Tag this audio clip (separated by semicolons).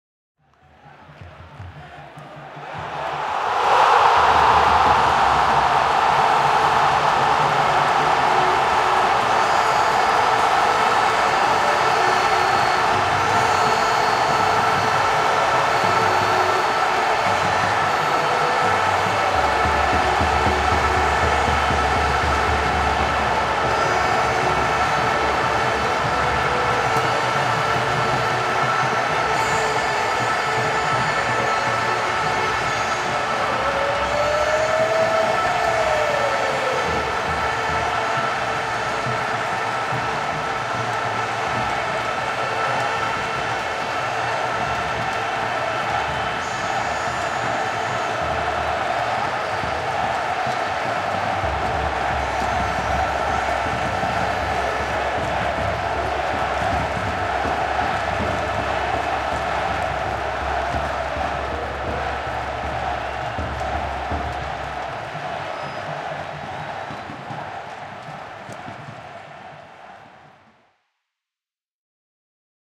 football; ftbol; goal; gol; play